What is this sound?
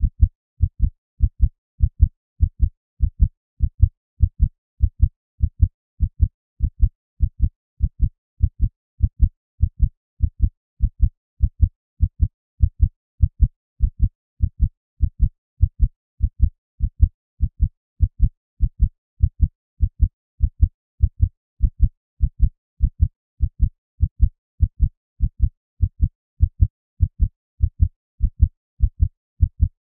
heartbeat-100bpm
A synthesised heartbeat created using MATLAB.